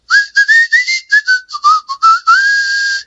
whistling a tune
field, recording, tune, whistling